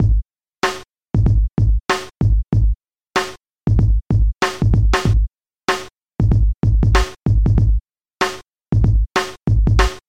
Drumloop For Chaos Bass (no hihat) - 4 bar - 95 BPM (swing)
95bpm, beat, drum, drum-loop, drumloop, drums, loop, rhythm, rhythmic